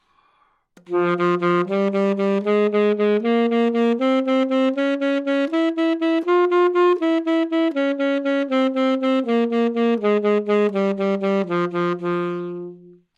Sax Alto - F minor
Part of the Good-sounds dataset of monophonic instrumental sounds.
instrument::sax_alto
note::F
good-sounds-id::6613
mode::natural minor
Fminor, good-sounds, neumann-U87, alto, sax